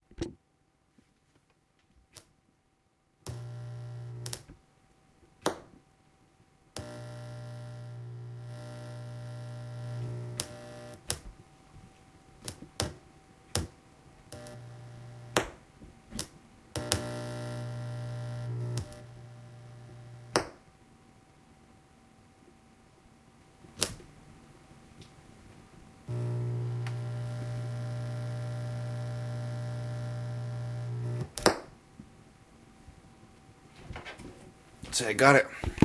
An audio cable being unplugged and plugged into a speaker at max volume and different speeds. Recorded using Zoom H1 recorder.